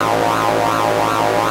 laser active big
Big laser shot loop
big, game, laser, loop, sfx